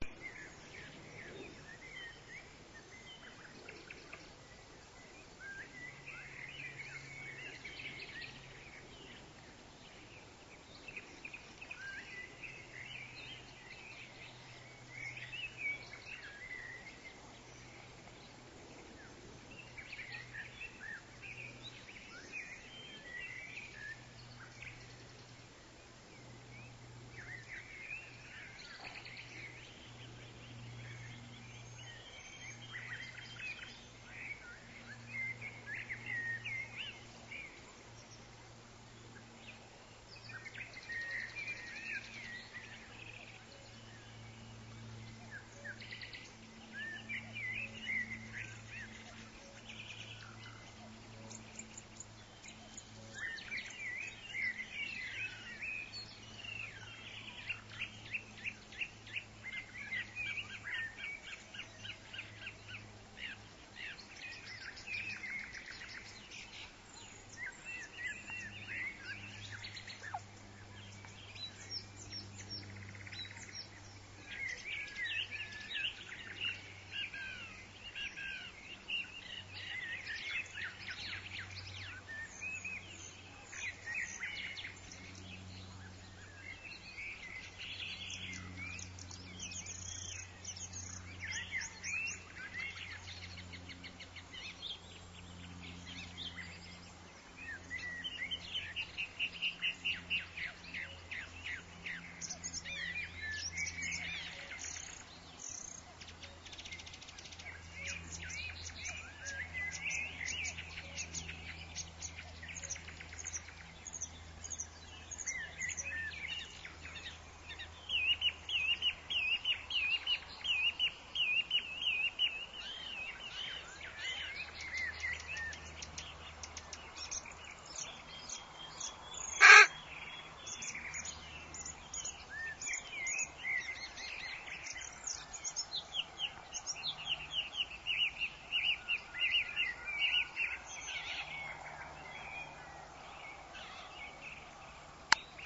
Sussex Woodland & Meadow Bird Sounds, Evening
Listening to nightingales & other sleepy birds in the evening... You can hear my baby rook Taki squak at the very end, cause he was getting restless & wanted to go home to sleep for the night.
You can hear nightingales, warblers and thrushes all around.
This was recorded using my crappy, old MP3 player in the countryside of Henfield, near Brighton, England, on the 31st of May 2010. I often play it & other recordings I made around there for my birds in the evening, as a kind of Nature lullaby.
UK, Forest, s, England, Natural, Corvidae, Song, Sounds, Meadow, Rook, Birds, Woodland, Sussex, Singing, Bird, Nature